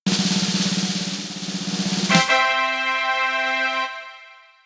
A short jingle made with Cubase
circus, drumroll, fanfare, horns, tadaa, victory